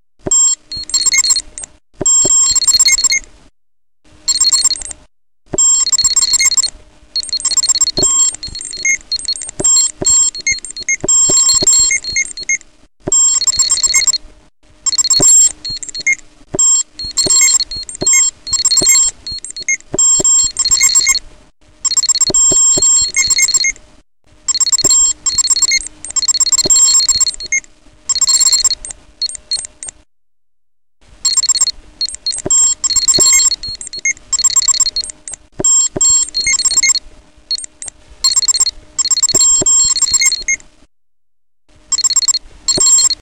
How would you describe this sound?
Great kazoo pointed at folder with the pack: Mc Donalds Happy Meal Handheld Electronic NBA Game Sounds by AMPUL, tried to select remix only showed latest uploads and downloads?